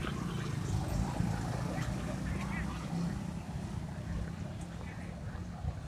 Registro de paisaje sonoro para el proyecto SIAS UAN en la ciudad de Palmira.
registro realizado como Toma No 07-ambiente 1 parque de los bomberos.
Registro realizado por Juan Carlos Floyd Llanos con un Iphone 6 entre las 11:30 am y 12:00m el dia 21 de noviembre de 2.019